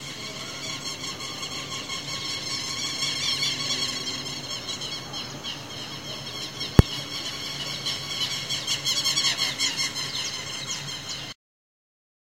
bird sunset sun